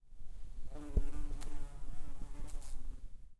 Field-recording with Zoom H5 of a wasp.
nature, buzz, insect, bees, wasp, field-recording